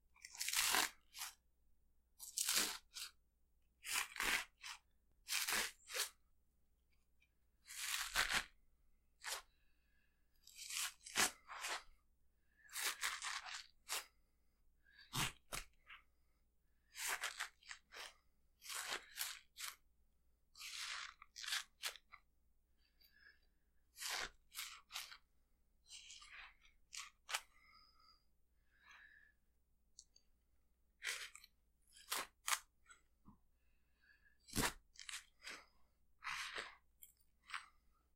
Person Stabbed with Knife, Large
The sound of a person receiving multiple large stab wounds, many in which the attacker twists the knife after the stab is completed. Recorded on MAONO AU-A04TC; created by stabbing the rind and flesh of a large, ripe jackfruit (often used as a meat substitute in vegan dishes for its fleshy texture).